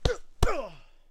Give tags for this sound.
Attack; Fight; Hit; punch; gut

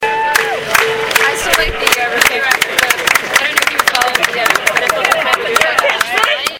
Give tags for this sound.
syncopated; clapping

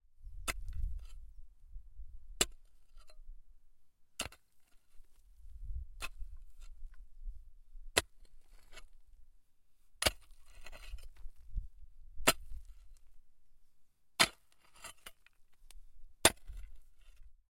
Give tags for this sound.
CZ; Pansk; Panska; pickaxe

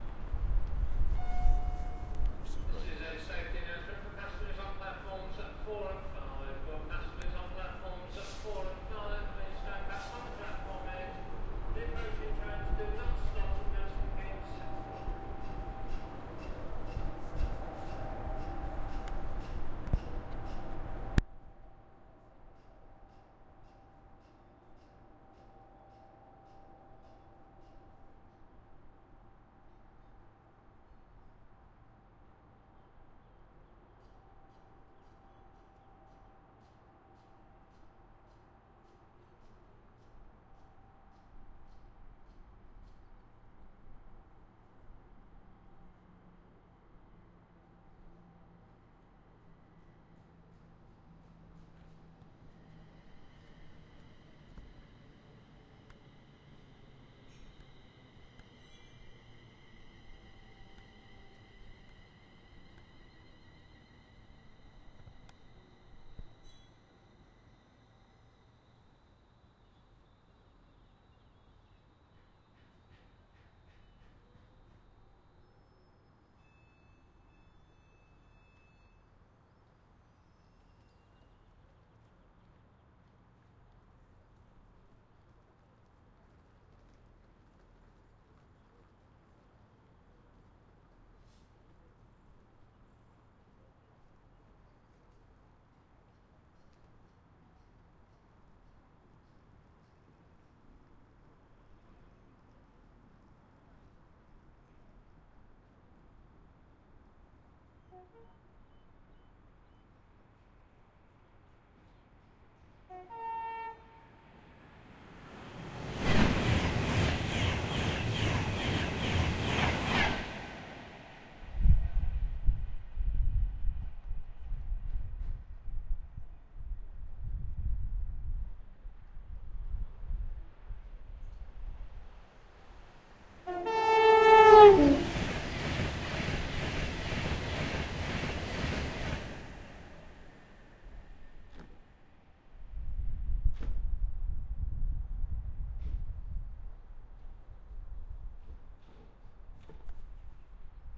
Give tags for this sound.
virgin; pendolino; electric; train; keynes; milton; railway

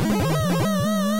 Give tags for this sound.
8-Bit; 8Bit; Game; Pulse; SFX; Square; Whoops; Wrong

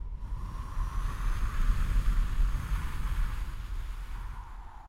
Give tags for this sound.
air; blow; wind

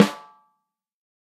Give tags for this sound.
snare; mapex

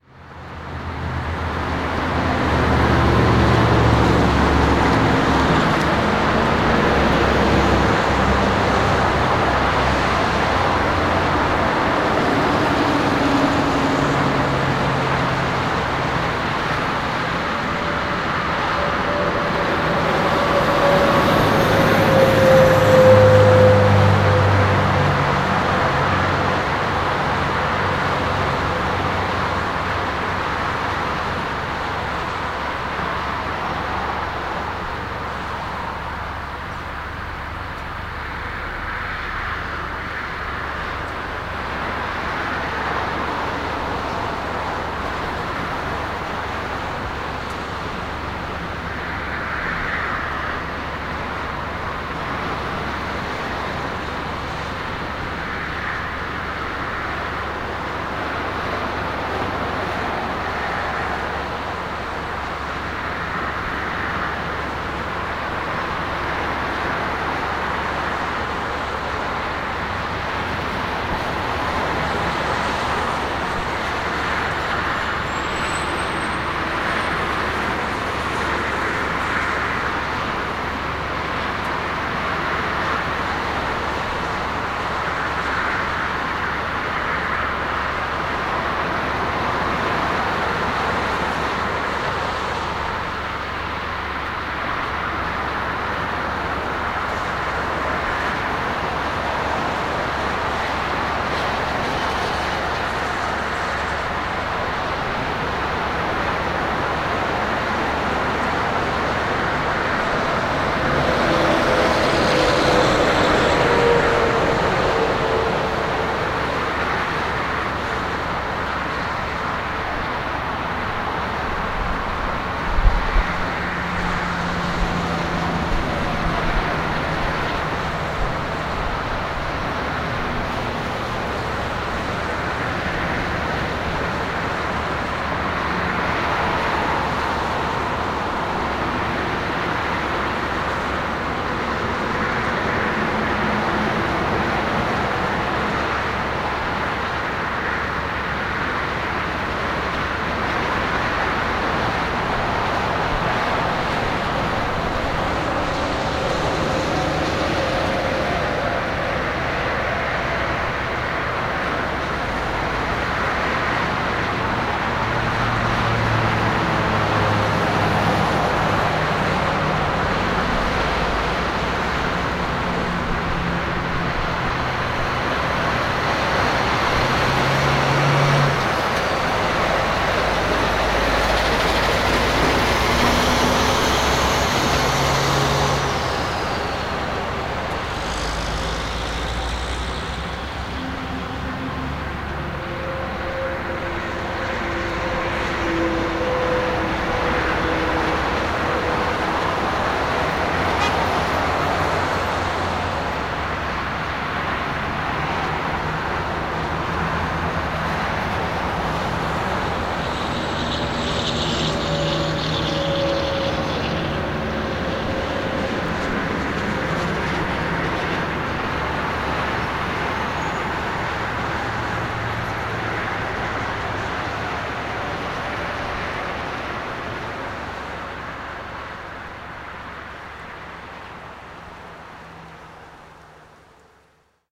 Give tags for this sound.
semi-trucks; I-95; cars; connecticut; highway

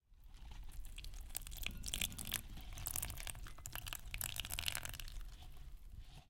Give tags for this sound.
bustle; CZ; Czech; flower; housework; Panska; Watering; ZoomH5

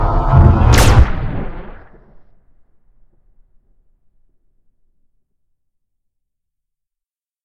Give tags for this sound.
shot; space; Sci-Fi; Laser; alien; energy

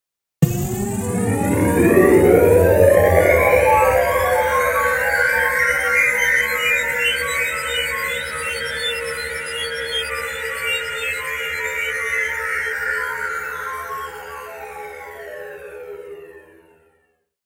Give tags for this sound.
outer; space; spacecraft; spaceship

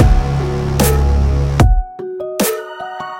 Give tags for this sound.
electro; samples; drum; instrumental; loops; electronic; 150; beat; experimental; trip; looppacks; dance; bass; glitch; hiphop

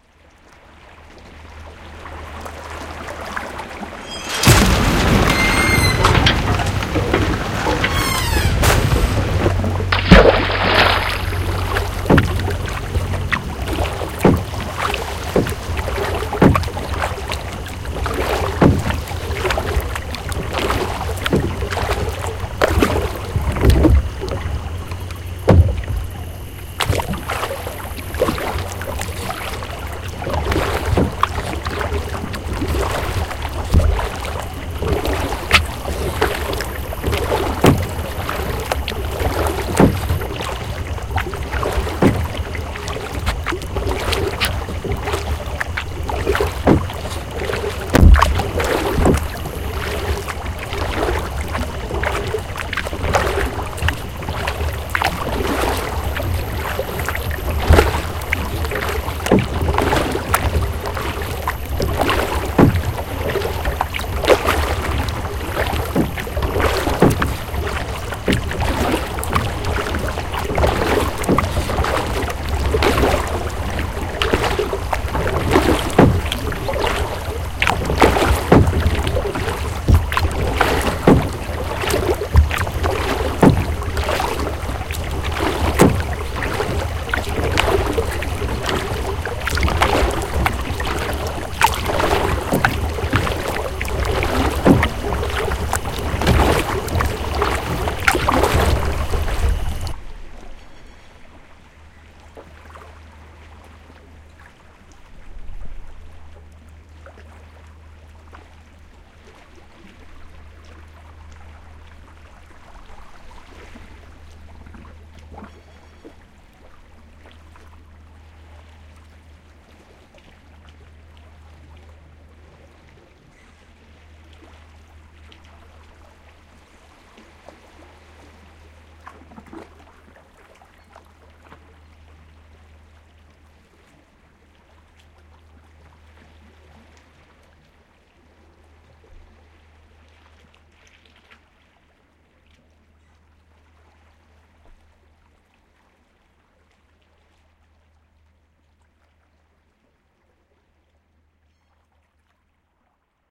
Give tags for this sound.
paddling; boat; rowing; sailors; ship; anchor; pirates; sea

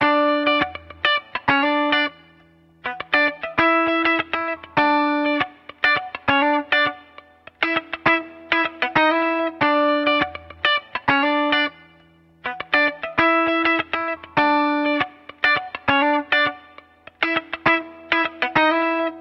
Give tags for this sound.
funk; guitar; rhythm-guitar

